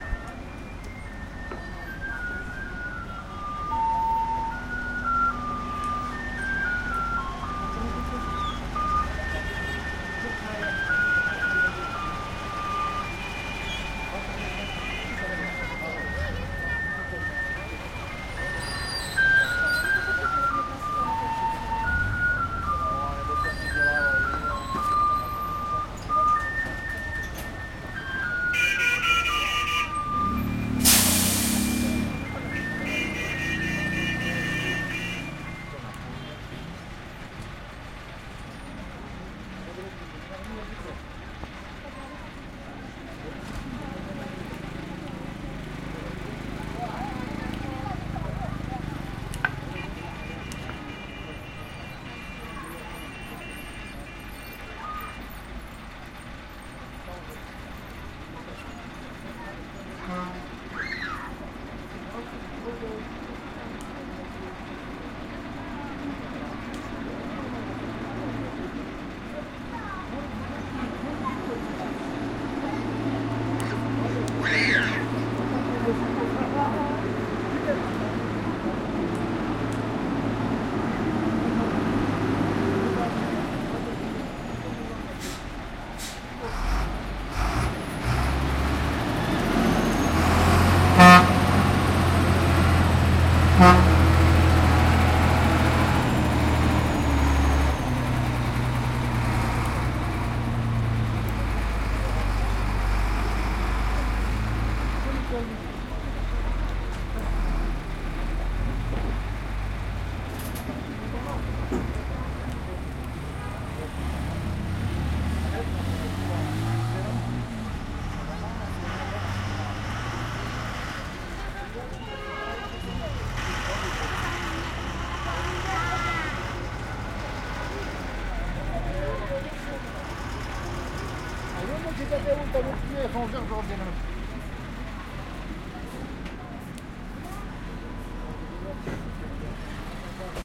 Sounds of Labrang town in China (cars, vehicles)
china, chinese, field-recording, labrang, people, street, town, traffic, vehicle